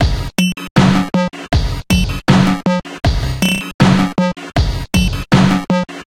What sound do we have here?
manneken+drum
beat, beats, bigbeat, breakbeat, drum, drum-loop, drumloop, drumloops, drums, idm, quantized